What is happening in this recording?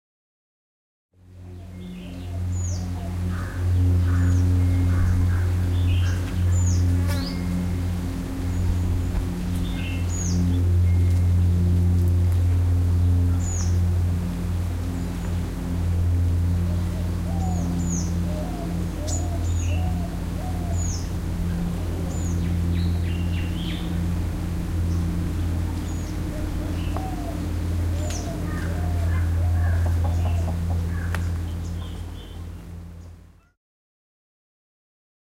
Turbo-prop airplane overhead
Turbo Prop Airplane overhead.
Airplane, Farm, Field-Recording, Turbo-Prop